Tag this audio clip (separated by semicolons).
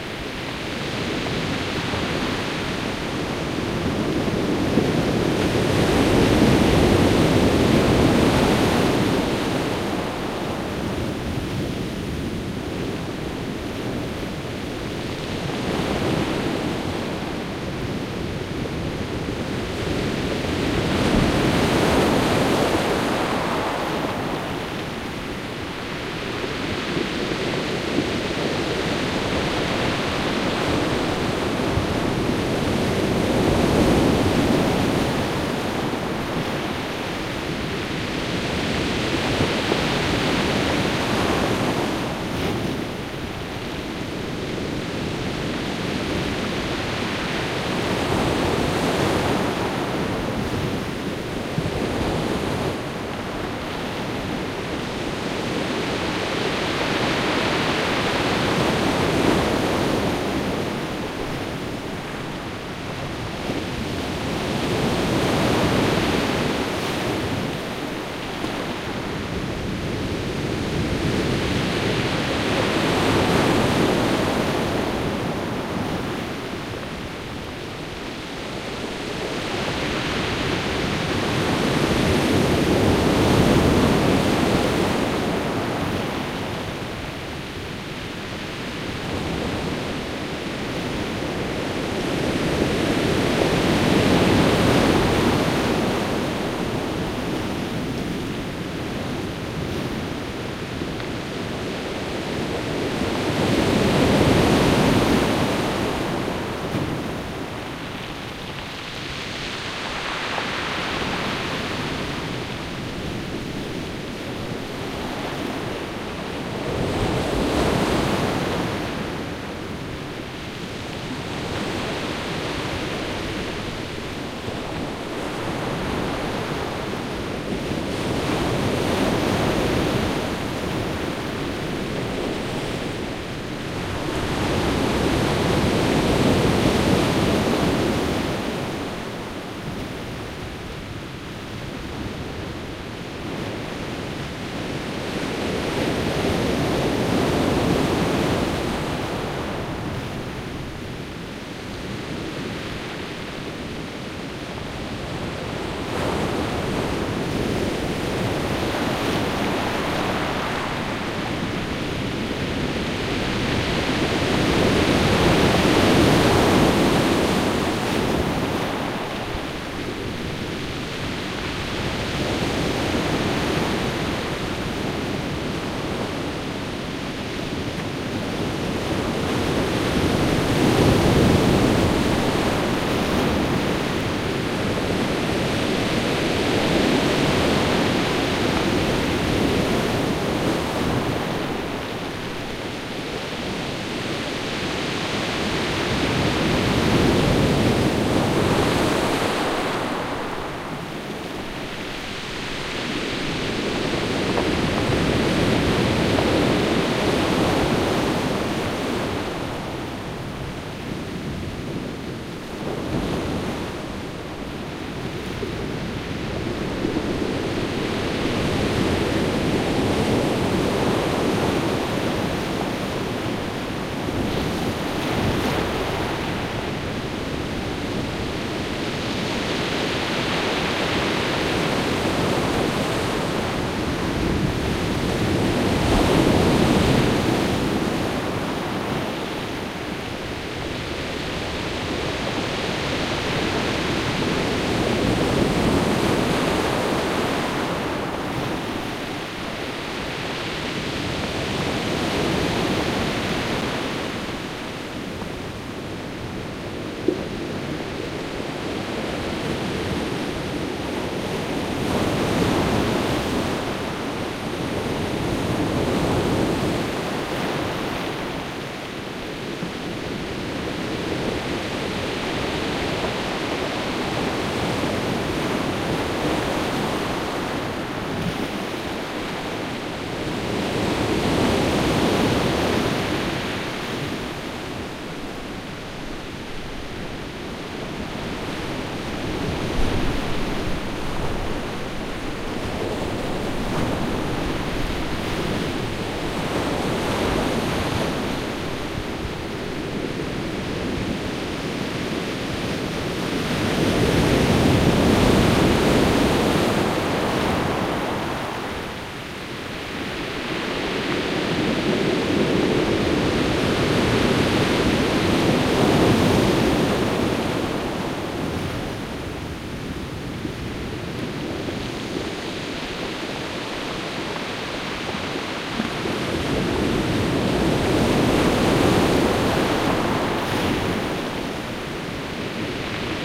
3d; beach; waves; headphones; field-recording; relaxing; sea; binaural; binaural-nature-recording; binaural-imaging; dummy-head